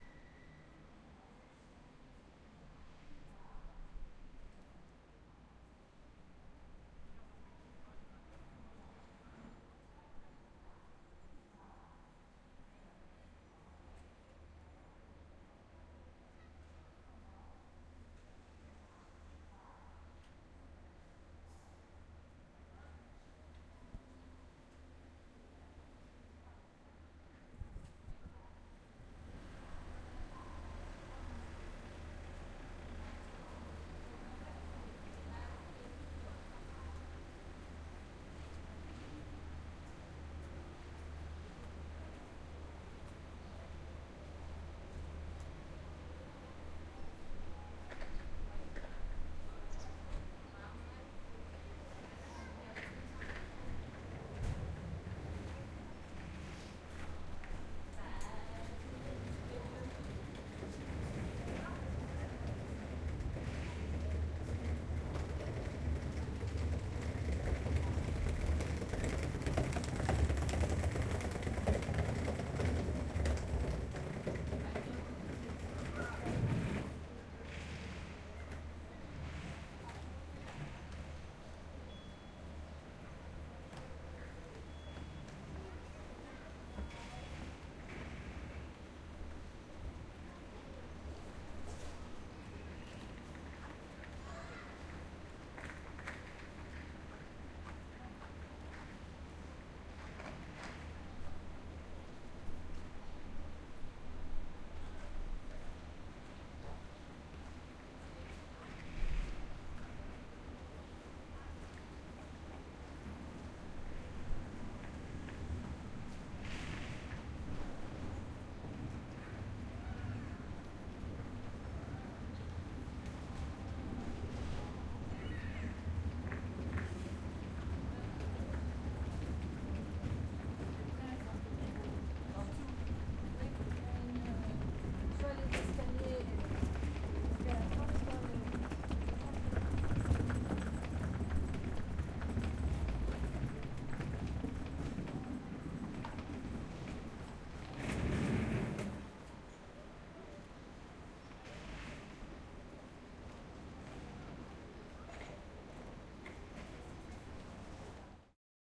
Sounds of passager with rolling suitaces